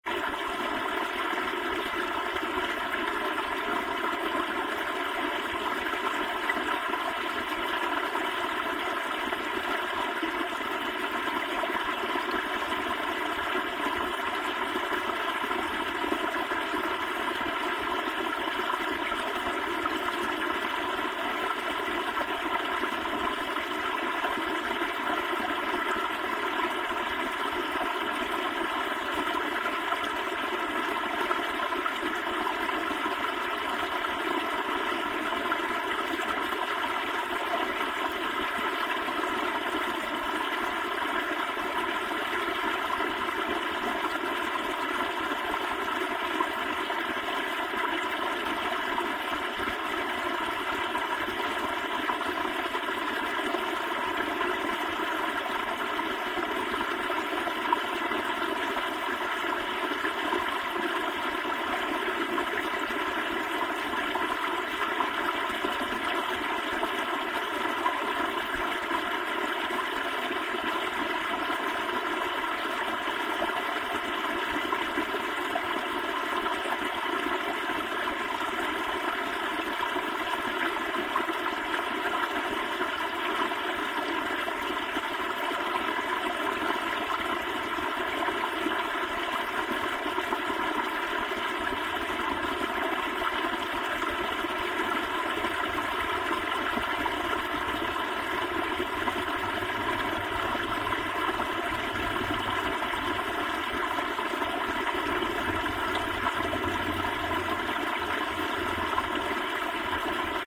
Water Flowing
Water splashing along at a small waterfall in a stream.